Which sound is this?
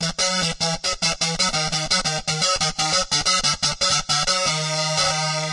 Live Dry Oddigy Guitar 09
guitars, bass, free, bitcrush, live, distorted, grit